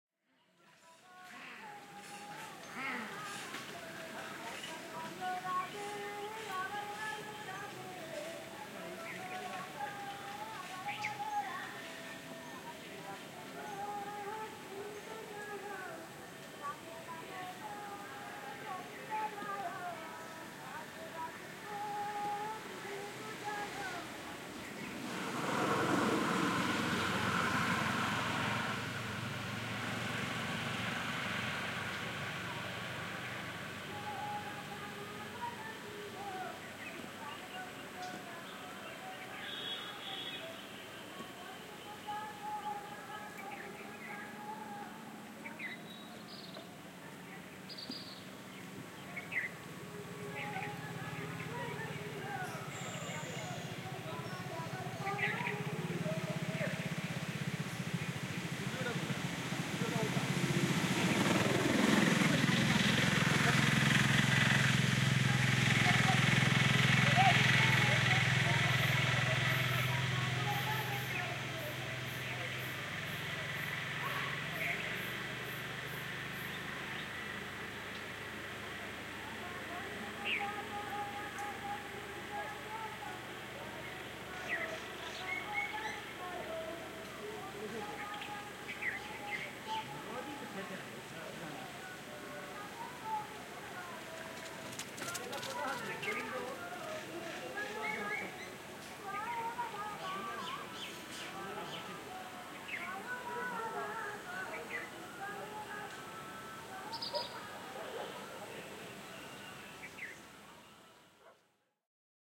An afternoon recording in a small rural place in Kolkata, India. Some loudspeaker song was heard from a distance.
Recorded with Zoom H5